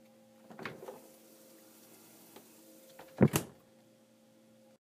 Fridge Opening Closing
Opening and closing a fridge door.
cl, close, closing, door, open, opening, refrigerator